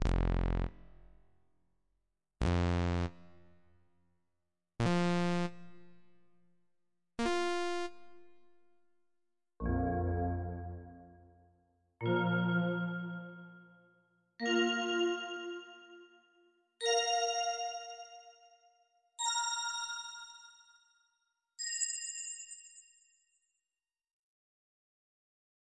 Collectable videogame sound compilation
Here you have some short sounds you can use to create the effects of achievements or collectable objects like coins, power ups...
I'm curious about what do you use this for.
arcade, coin, collectables, game, pick-up, power-up, retro, simple, synthetic, video-game